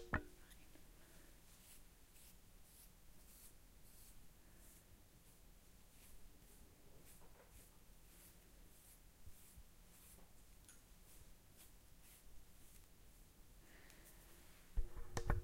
Fine tooth comb brushing short hair, close. Faint breathing also.